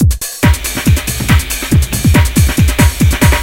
Drumloop Dance (amen break + fill) - 2 bar - 140 BPM (no swing)
Made with Rhythm Station. Giz (Amen Break) included.
140-bpm, amen-break, beat, breakbeat, crunch, dance, drum, drum-loop, drumloop, drums, giz, hammerhead, loop, rhythm, techno